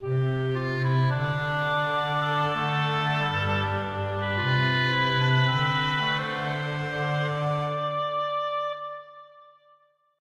rising hopes
A short brass/wood instrument swell